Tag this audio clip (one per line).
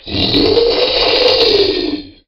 death
Dinosaur
Monster
scary